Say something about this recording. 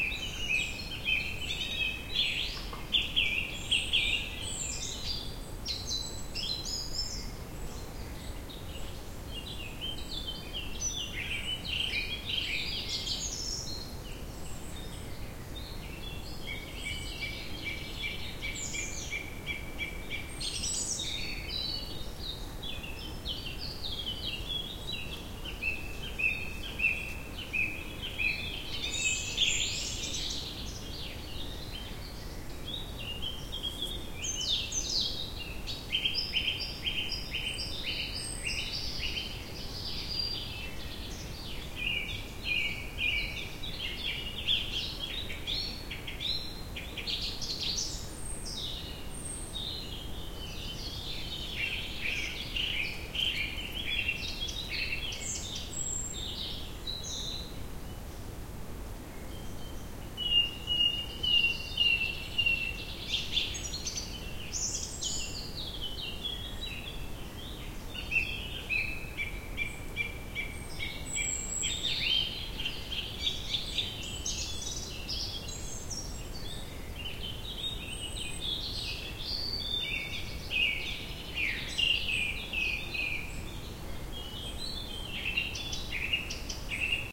140809 FrybgWb Forest Evening R
Evening in the forest above the German town of Freyburg on Unstrut, located in the valley between a vineyard and Neuenburg Castle.
Natural sounds abound: birds singing, insects, wind in trees and creaking branches, with a very nice "forresty" reverb.
The recorder is located at the bottom of the valley, facing towards the town, which, however, is no longer audible this deep in the forest.
These are the REAR channels of a 4ch surround recording.
Recording conducted with a Zoom H2, mic's set to 120° dispersion.
4ch, ambiance, ambience, ambient, atmosphere, birds, field-recording, forest, rural, summer, surround, Unstrut, vineyard